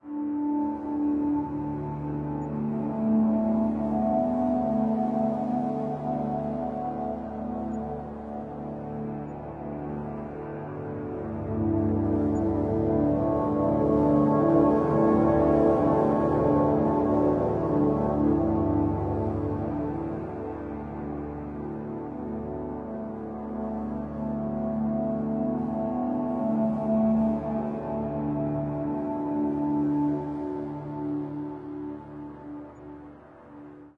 FX Te absolvo
A heavily processed chord progression, rather majestic.